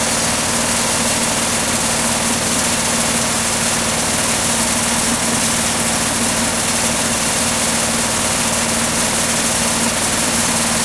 AirUnit Maggie
Sound of the AC compressor unit in my girlfriend's backyard. Very noisy!